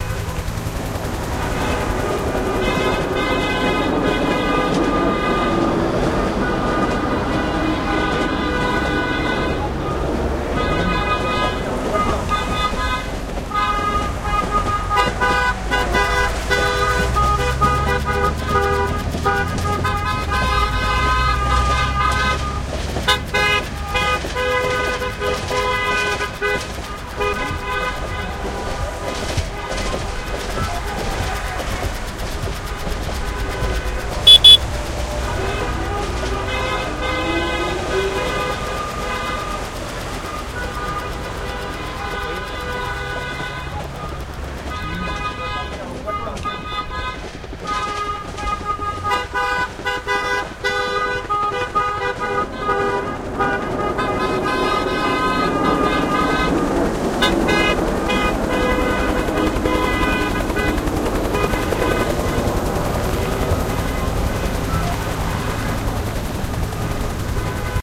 cars and trucks and motorcycles